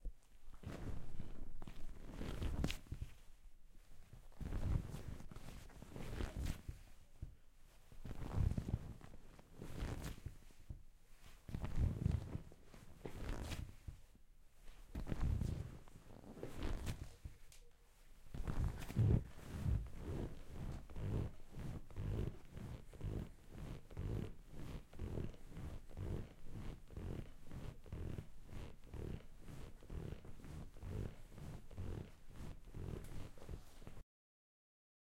Person standing up, sitting down and rocking on leather couch. Leather stretching and squeaking. Can be used for foley and movements across materials and leather. Recorded with a zoom H6 recorder/ microphone on stereo. Recorded in South Africa Centurion Southdowns estate. This was recorded for my college sound assignment.